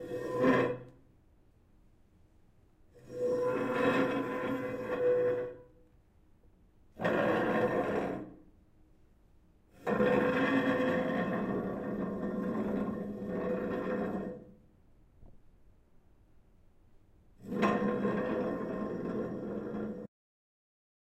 Moving Chair
Zoom H6 Recorder using the XY Stereo microphone. The sound is of a chair being dragged, pulled, across a concrete floor.